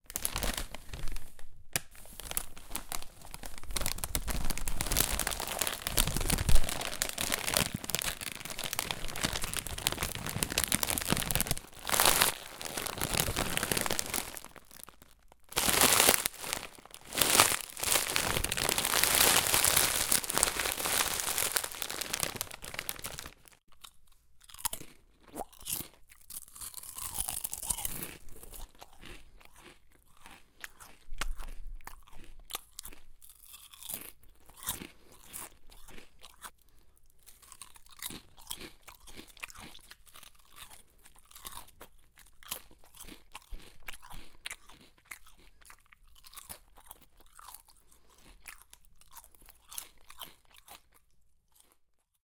Chipbag and eating chips
Miked at 3-4" distance.
Bag of chips compressed and crushed; chips eaten.